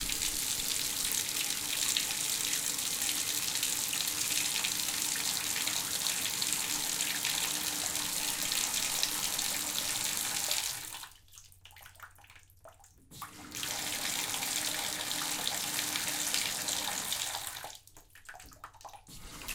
Running water in the bathtub.